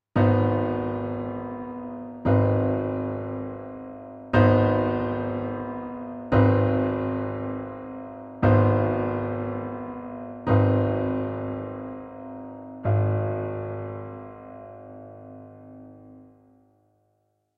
This is something what I'm thinking of for a while. It's not very musical but I'm trying to find how are this type of chords useful?. When I listen this chord it gives me some strange sad-happy mood that changes rapidly in my head.